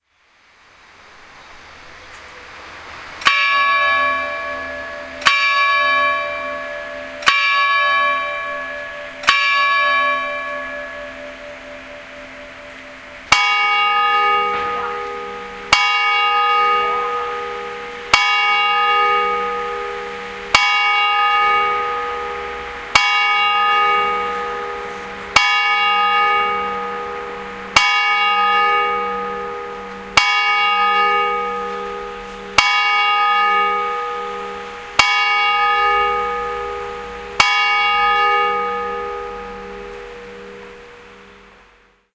Campanes Asil Vilallonga
This sound was recorded with an Olympus WS-550M and it's the sound of the asylum Vilallonga bells ringing at eleven o'clock.
eleven, bells, ring, asylum